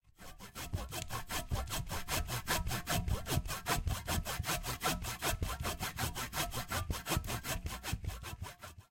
Sound of hand saw
CZ, Czech